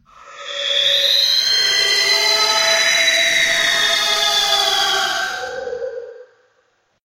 Ghost Scream ver 3
A sound effect for a game that I ended up not making. It's meant to be a spooky scream.